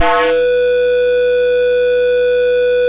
Line-out interference on a Motorola V60p